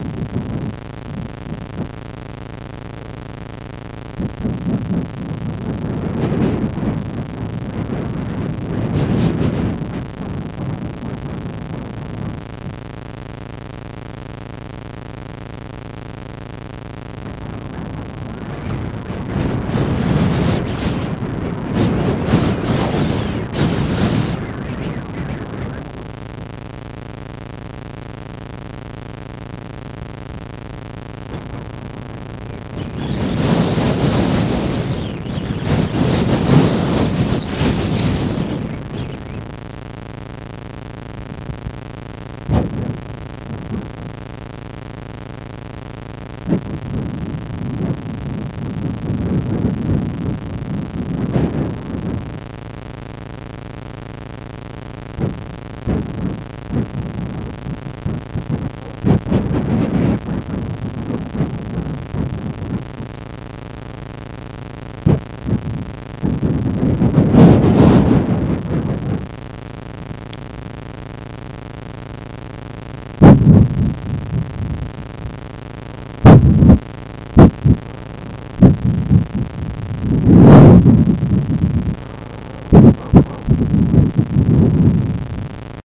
your body is investigated by unknown force while you are sleeping
sleeping, unknown